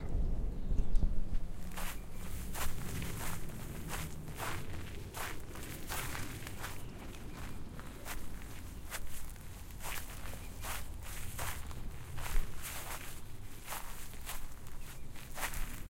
Walking on Grass with shoes